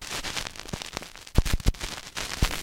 The stylus hitting the surface of a record, and then fitting into the groove.